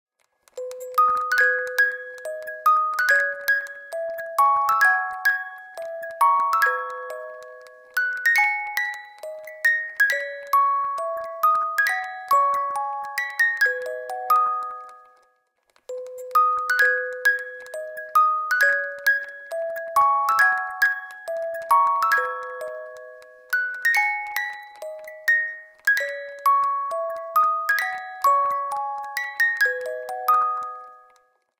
Clockwork Musicbox "Mackie Messer"
This is the sound of a small hand cranked music box, that I took the liberty to record...
"Drei-Groschen-Oper"
Melody:
"Mackie Messer"